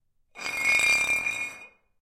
spinning j&b bottle
Spinning Glass bottle on wood.
spinning, turning, twisting, OWI